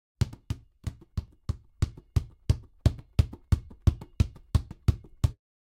03 Basketball Dribbling - Fast
Dribbling a basketball rapidly.